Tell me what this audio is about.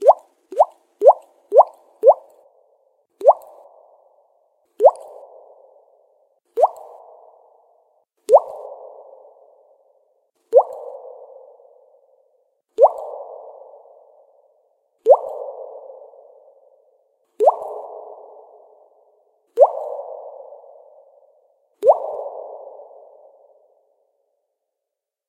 Tap Drip Vox inc reverb
flicking the side of my cheek to achieve this noise and applied more echo over time for varied effect.